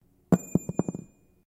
Key Drop 1
Sounds like "ping!"
folly, hit